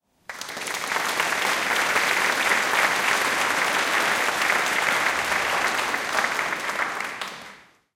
A recording from an event in my town's church.
recording device: Canon XM2 (GL2 for the US)
editing software: Adobe Audition 3.0
effects used: clip recovery, normalization
record, short, meeting, applause, event